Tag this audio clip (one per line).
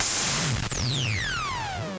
metasonix-f1
open
future-retro-xs
tr-8
tube
hihat
symetrix-501